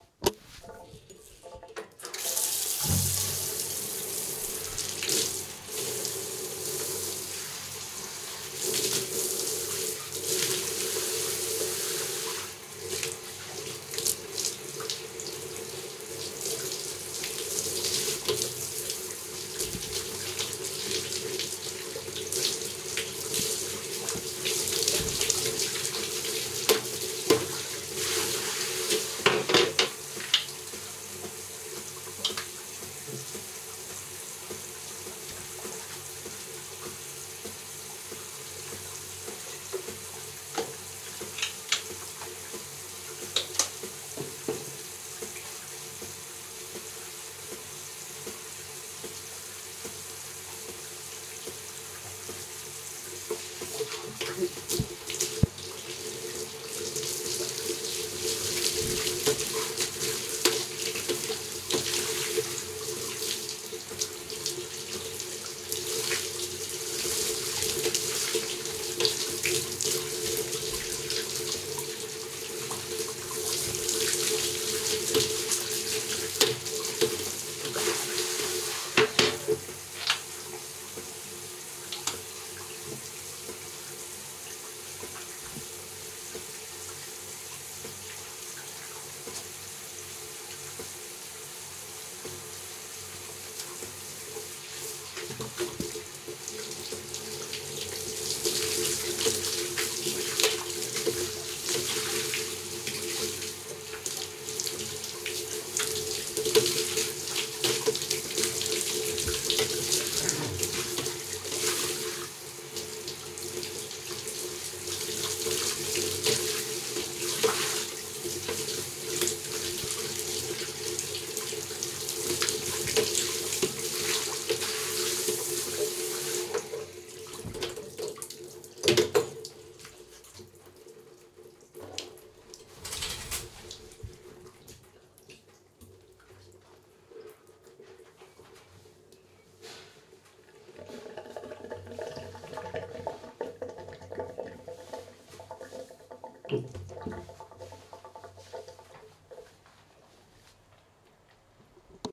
shower
Recording: Tascam DR-1